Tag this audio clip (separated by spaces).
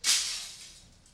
broken field-recording glass smash